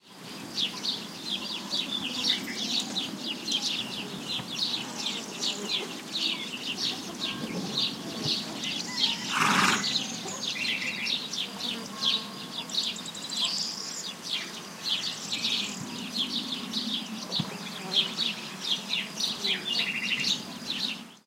20170507 horse.stable
Stable ambiance short take: bird chirpings, fly buzzings, one horse snorting. Primo EM172 capsules inside widscreens, FEL Microphone Amplifier BMA2, PCM-M10 recorder. Recorded near Bodonal de la Sierra (Badajoz province, Spain)
stable, farm, barn, animal, field-recording, horse